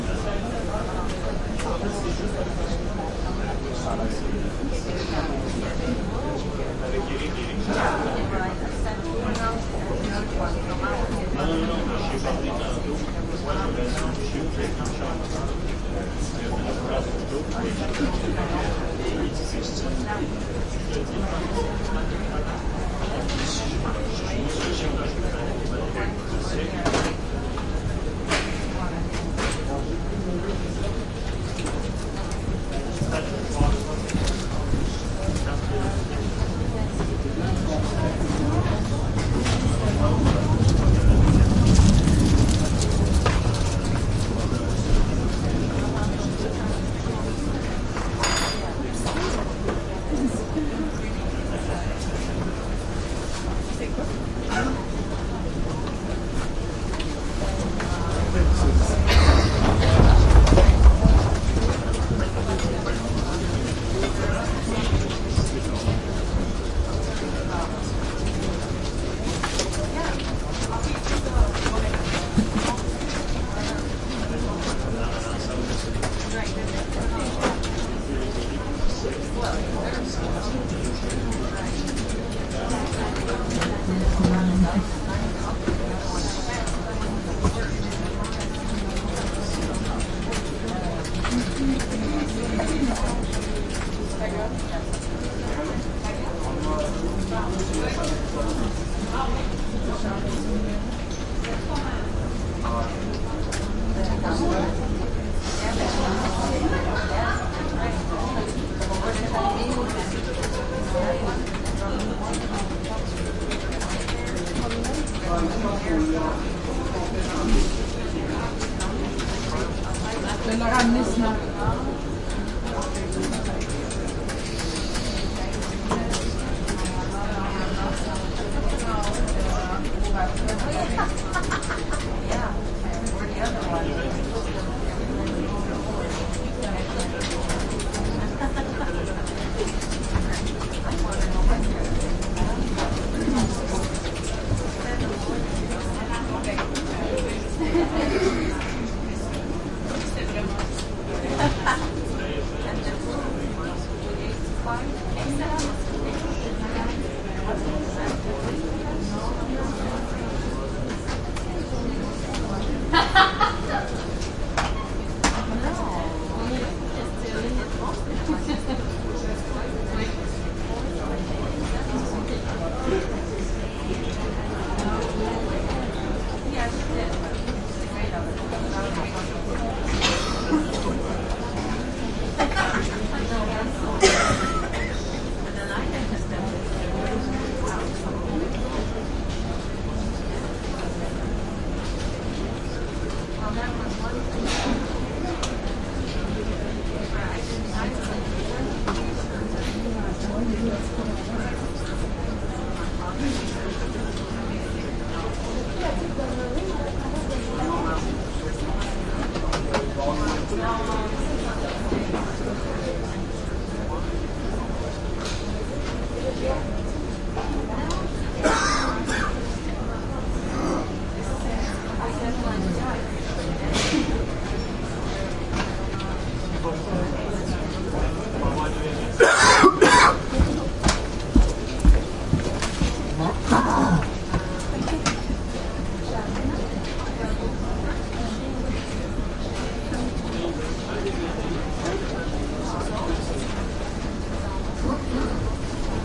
CBC Radio Canada RDS newsroom quieter corner2 computers typing people walk by carpet quebecois voices Montreal, Canada
corner, computers, CBC, voices, typing, Montreal, Canada, RDS, quebecois, newsroom, quieter, Radio, people